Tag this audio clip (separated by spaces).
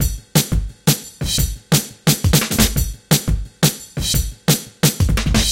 break breakbeat dnb